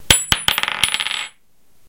gun shell 7,62mm drop on wood from 5cm hight